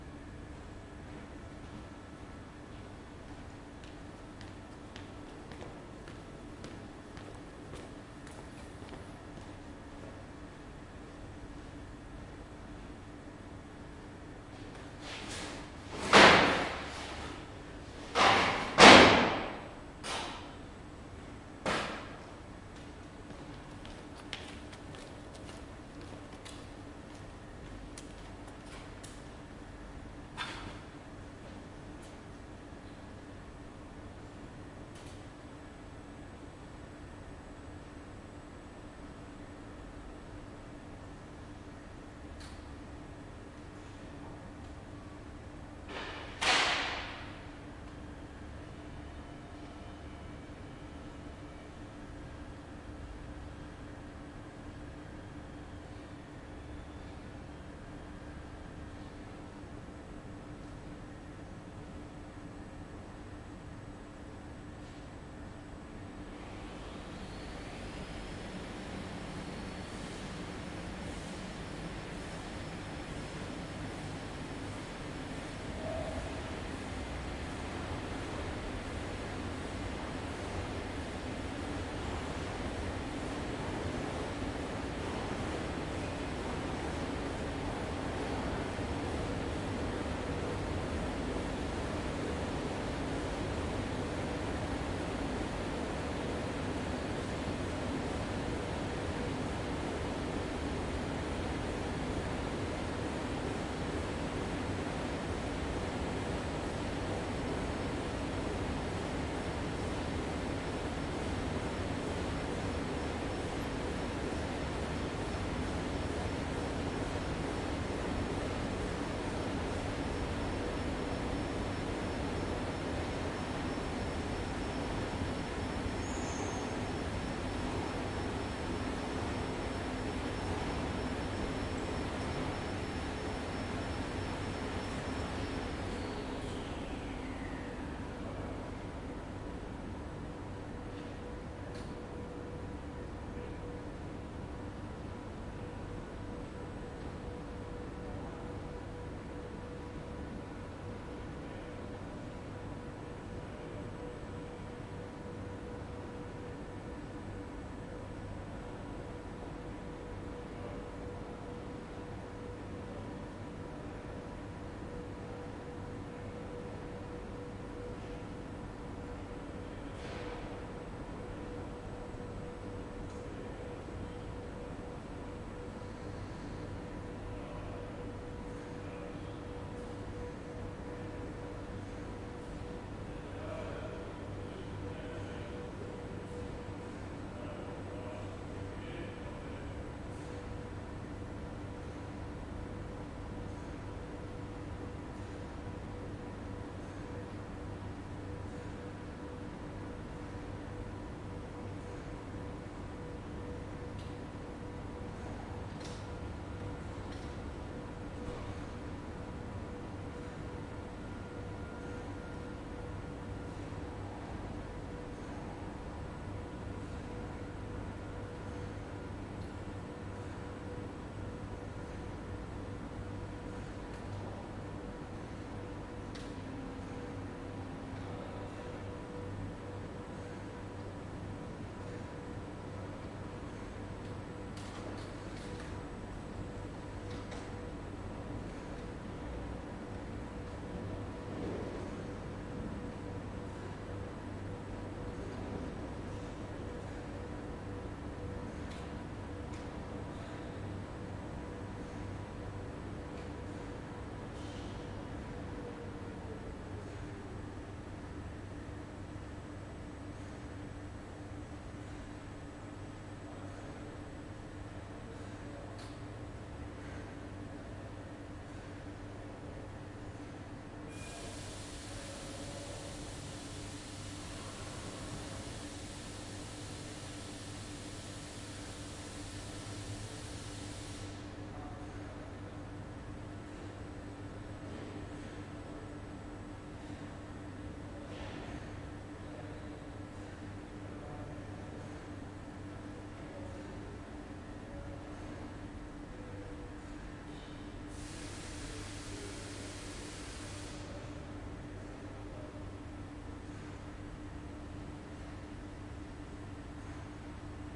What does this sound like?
180619 BerlinKoenigsdruck WA PaperStorage ST
Stereo recording of the paper warehouse of a printing firm in Berlin/Germany. Recording was conducted in the center of the warehouse, the printing machines and workers on the main printing floor can be heard in the background. In the beginning, a worker comes in, removes some paper and walks off again.
Recorded with a Zoom H2n, mics set to 90° dispersion.
This recording is also available in 5.1 surround. Drop me a message if you want it.
hall, industrial, press, quiet, work